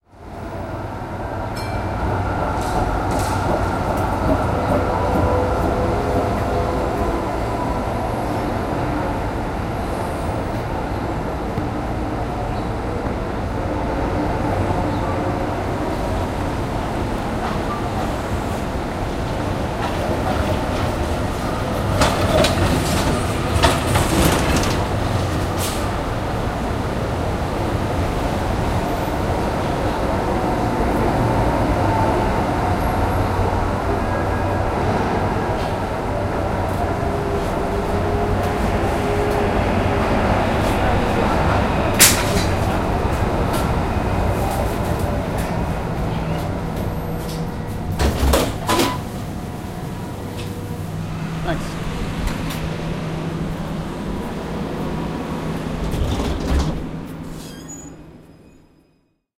Trams in melbourne
A field recording of a tram intersection.
street, city